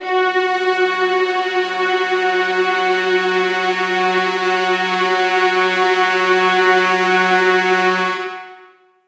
Spook Orchestra F#3
Spook Orchestra [Instrument]